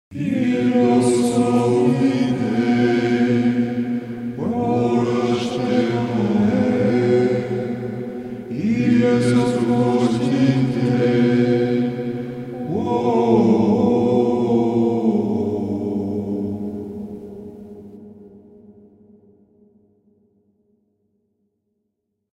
Cathedral Monk Chant Gibberish By DST. Sounds like an ancient language.